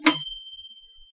microwave ding
I was making popcorn, so I decided to take some sounds while doing it.
bell, microwave, ding